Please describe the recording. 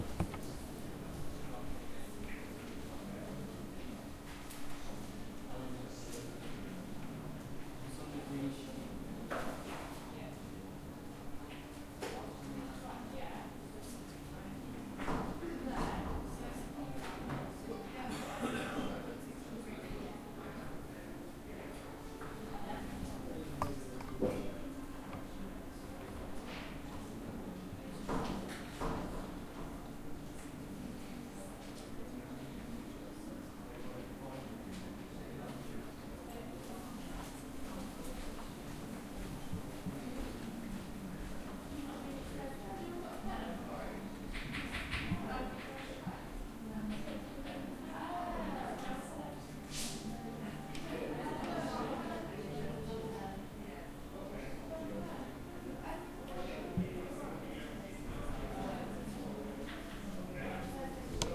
some doors closed some doors open, background ambience of students and lessons (empty hallway)